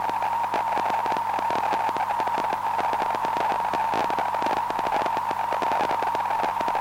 Noise 004 hollow
Hollow and clicky noise produced by the Mute Synth 2. Reminiscent of certain sounds that can be heard on short wave receivers.
click, Mute-Synth-II, electronic, analogue, Mute-Synth-2, hollow, noise